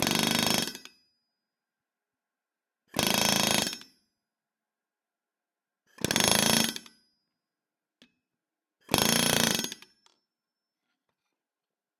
Pneumatic hammer - Atlas Copco r4n - Forging 4
Atlas Copco r4n pneumatic hammer forging red hot iron in four strokes.
impact, blacksmith, forging, tools, metalwork, pneumatic-tools, labor, hammer, atlas-copco, 80bpm